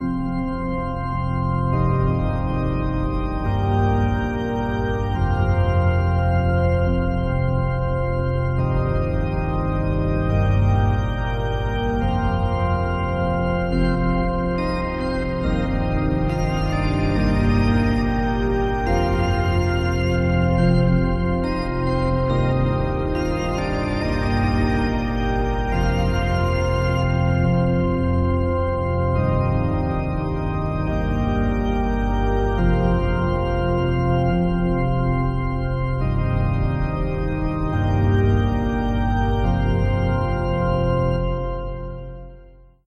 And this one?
Cathedral Organ
Some chords played on an organ. It sounds like your in a cathedral.
God, chords, ambient, holy, organ, cathedral, church